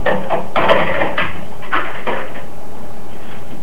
experimental sound recorded with my handy and after that i cutted it in soundforge.
this one is a peanut can.
best wishes and friendly greetings from berlin-city!